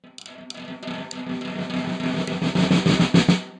Snaresd, Snares, Mix (12)
Snare roll, completely unprocessed. Recorded with one dynamic mike over the snare, using 5A sticks.
roll, acoustic, drum-roll, snare